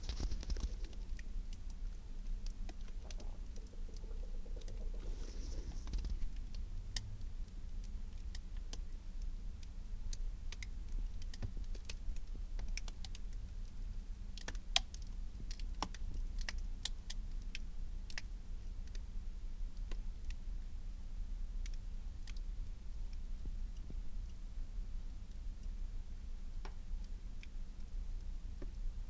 Freezer crackling
I put a Tran lav mic into the freezer... most of the sounds are ice crackling (starting to thaw? not sure). Pretty subtle. Sounds a lot like a crackling fire, ironically.
appliance, appliances, cold, freezer, fridge, household, ice, kitchen, refrigerator